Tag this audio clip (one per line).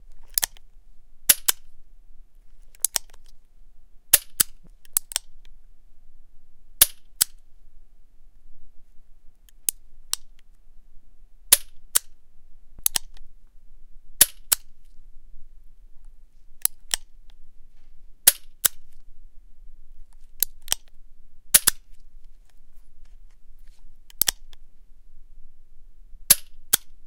pistol
reaload
gun